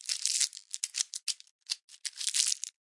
candy wrapper crinkle short A
a brief crinkling of a plastic candy wrapper with fingers.
candy, crinkle, wrapper